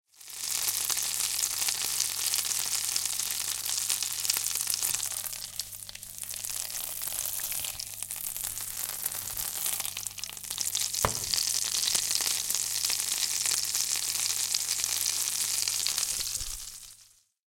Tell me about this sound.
me cooking vegetables in butter